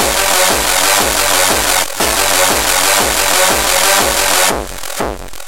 Kick Of Satan